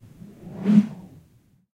A stereo field-recording of a swoosh sound created by swinging a 3.5m length of braided climbing rope. Rode NT-4 > FEL battery pre-amp > Zoom H2 line-in.